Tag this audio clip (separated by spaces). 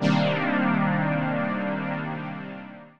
analog chords dance house synth techno wave